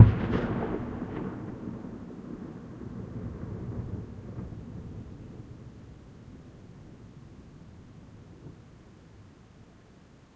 Large explosion - dynamite during a fiesta in the Sacred Valley, Cuzco, Peru. Long natural mountain echo.Recorded with a Canon s21s.